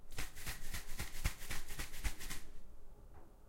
spray
ZOOM H6
bathroom, bottom, spray